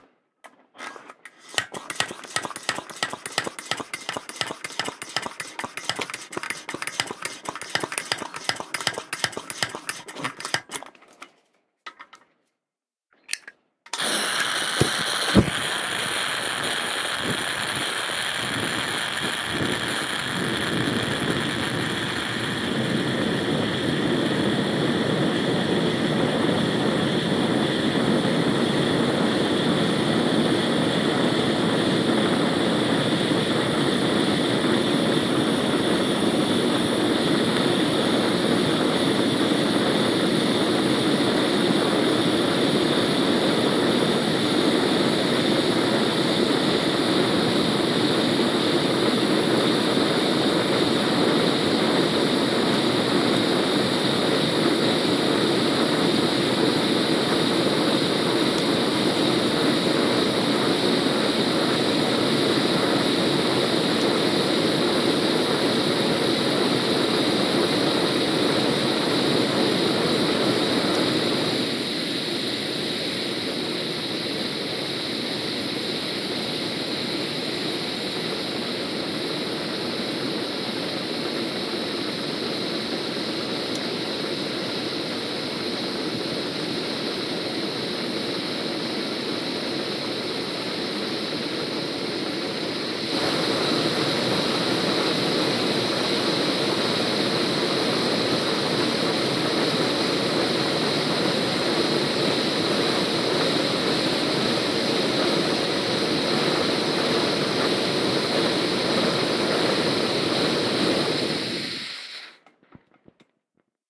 A mono field-recording of a Coleman petrol (gasoline) camping stove being pumped with air to provide pressure in the tank, the valve is then opened and the fuel is ignited. Rode NTG-2 > FEL battery pre-amp > Zoom H2 line in.
fire, burn, ignition, field-recording, flame, burning, mono, petrol, stove, burner, gasoline, combustion